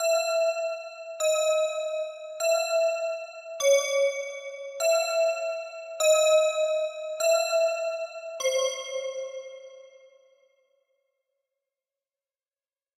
Creepy Bells
I hope this was usefull.
bells, creepy, scary